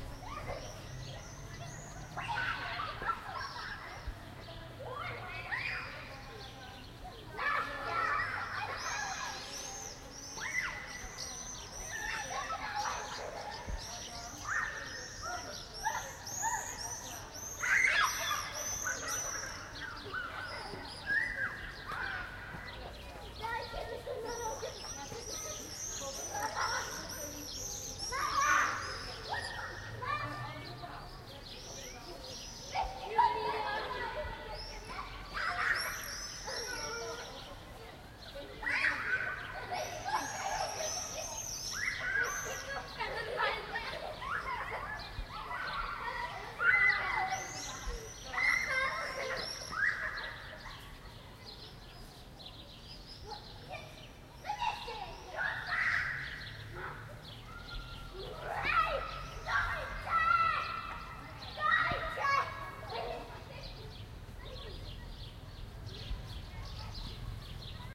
Smalltown Playground Summer Afternoon
This is the first recording I made with my Zoom H1 (probably will be making more soon). I made it from my balcony, late afternoon - there is a large playground surrounded with 4 four-storied blocks, so there's a great echo. The birds are very noisy at this time of day here and kids as well. There are also some distinct parents talking in the background. Recorded in small town in Poland.
screaming, playground